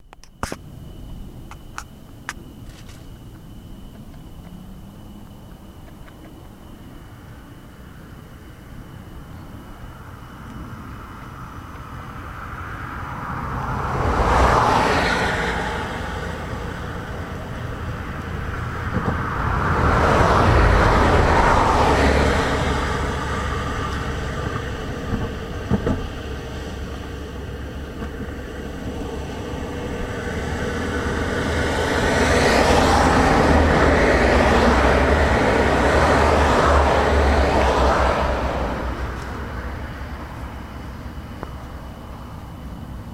highway to hell on a bridge